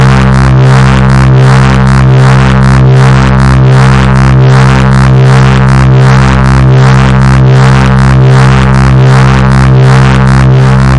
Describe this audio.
ABRSV RCS 032
Driven reece bass, recorded in C, cycled (with loop points)
heavy, harsh, bass, drum-n-bass, reece